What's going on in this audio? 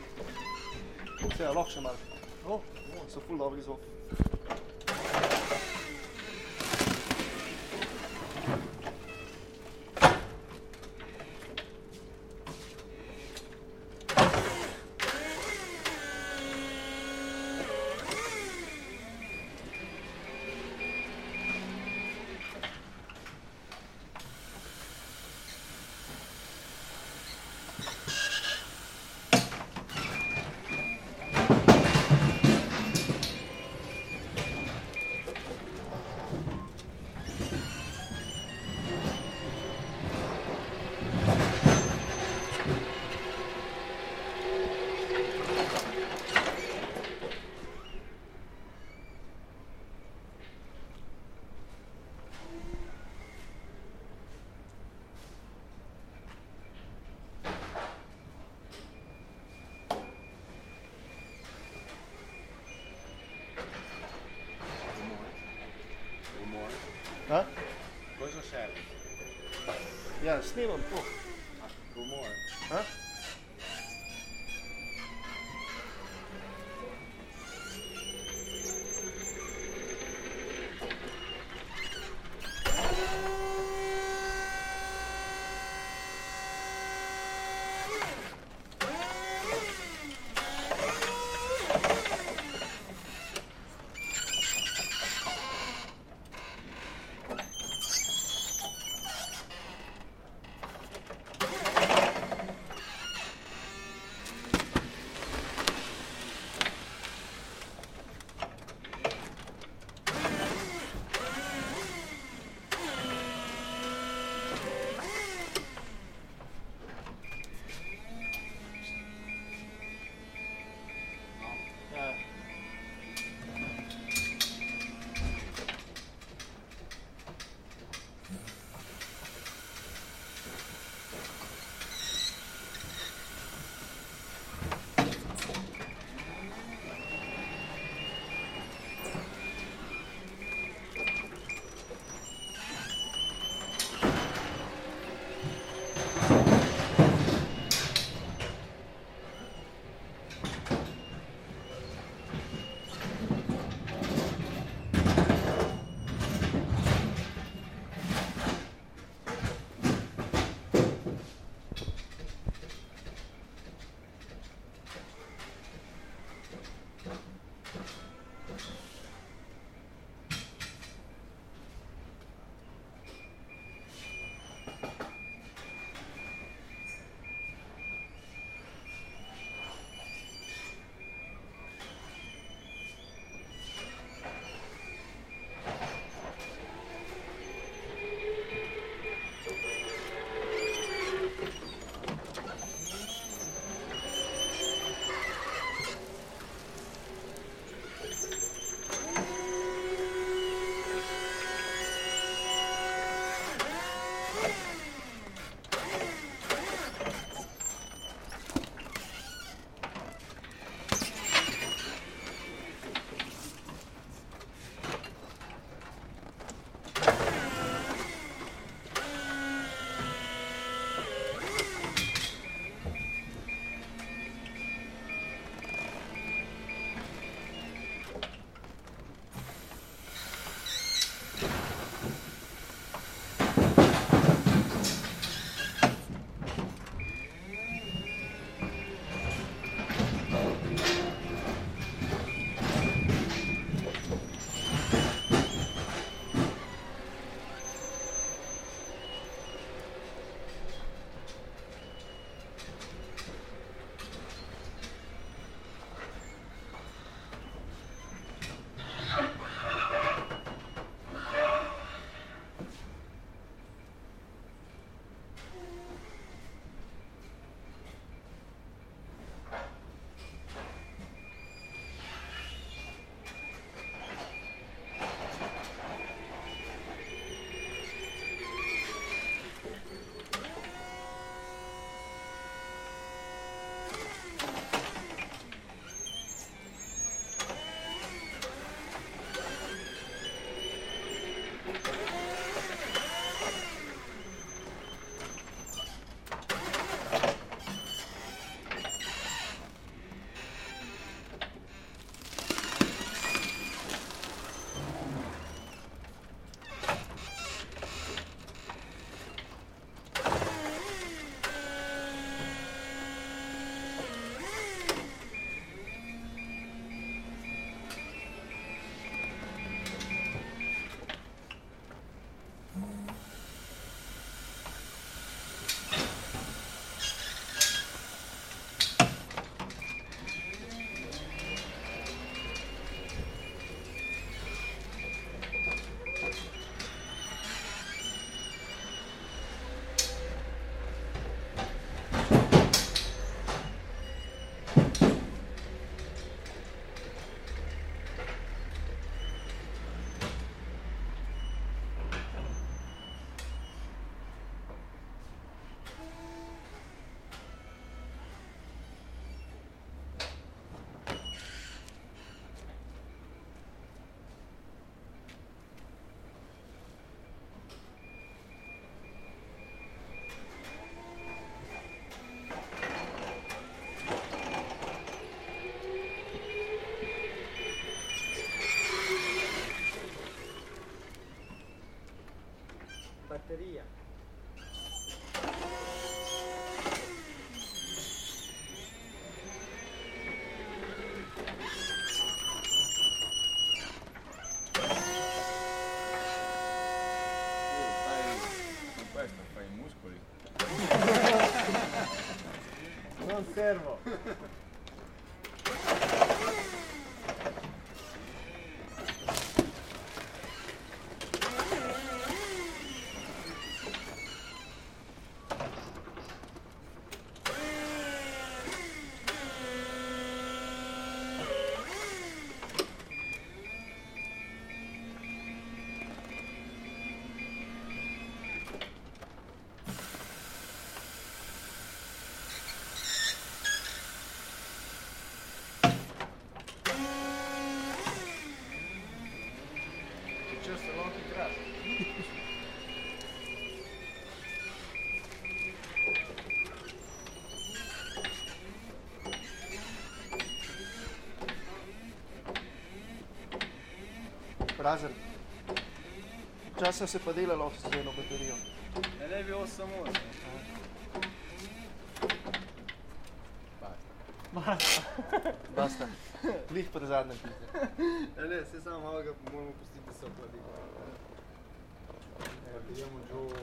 electric forklift hydraulic stretch foil tear
electric, forklift, hydraulic